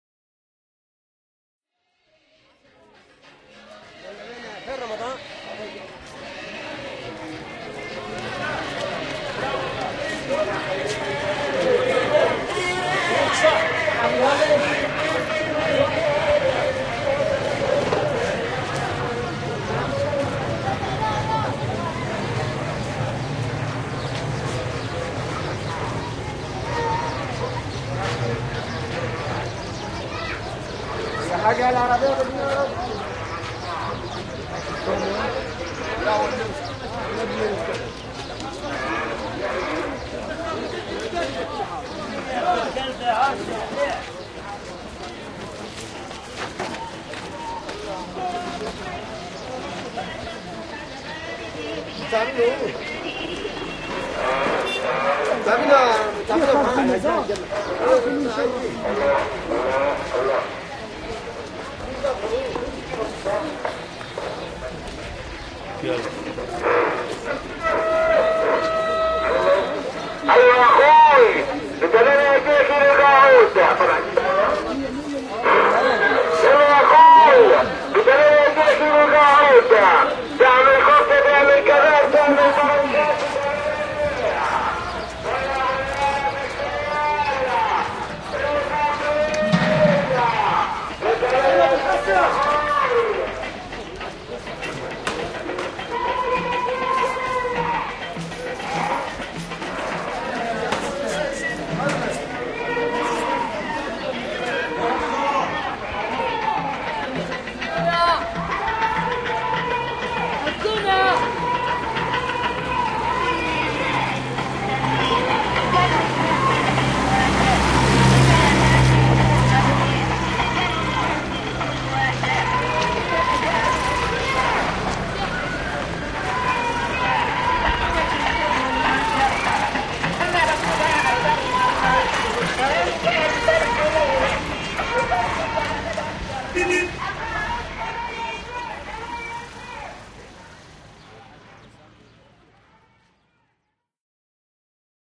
Recorded while walking through a crowded street market, I pass by a number of noisy stalls and finally run into a strident political message blared from a bullhorn.